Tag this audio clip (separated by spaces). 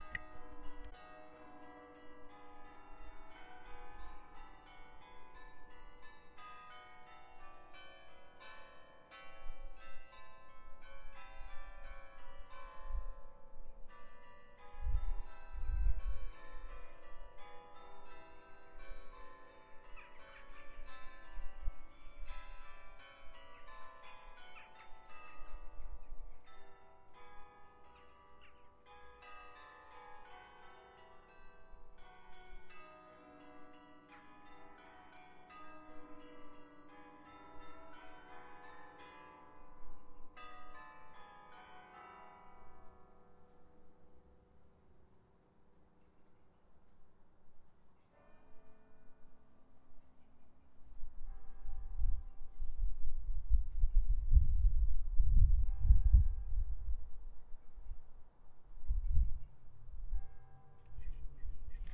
Cathedral Church